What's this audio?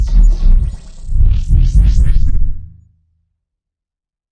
Shields down
Shield going down and regenerating created with Harmless
Game, Regeneration, Space, Shields, Alien, Video